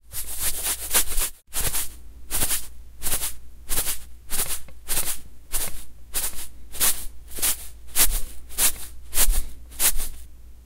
Miked at 2-3" distance.
Salt shaken inside plastic container.